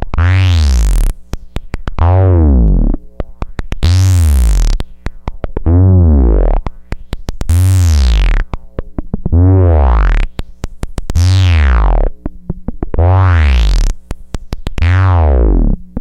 bass stretch 1
Raw analog Bass stretch sounds, oscillating goodness, from my Moog Little Phatty + the CP-251 voltage attenuator plugged into the pitch cv control
bass, analog, stretch, synth